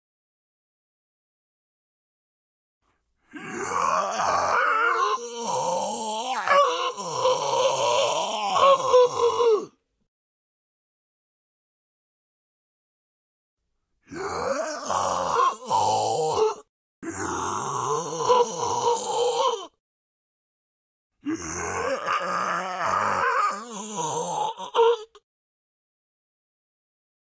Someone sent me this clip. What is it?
Sick Zombie 02
creepy, ghost, horror, monster, scary, spooky, undead, yelling, zombie